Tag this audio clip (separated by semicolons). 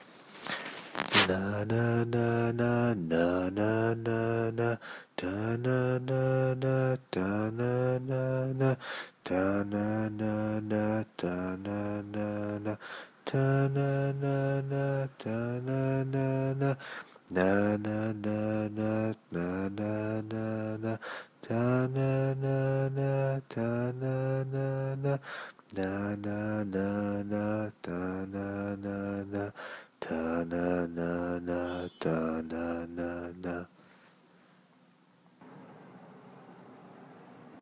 song; music-box; musical; meloday; dream; chorus